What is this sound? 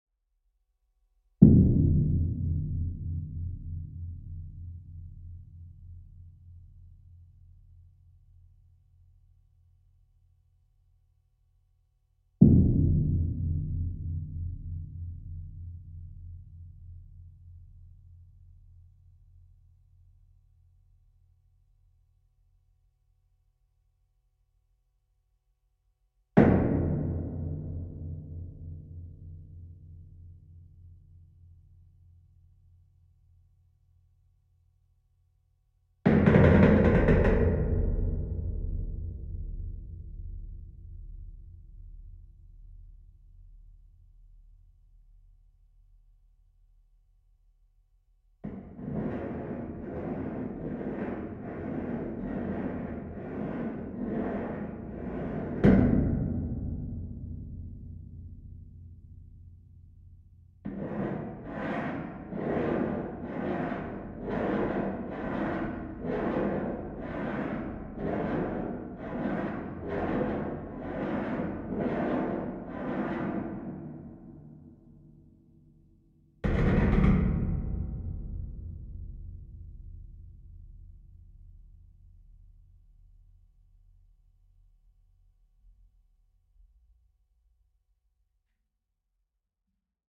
boom crash
A piezo element taped on to chicken mesh. I hit and scraped the mesh with a drum stick.
dungeon, clang, metal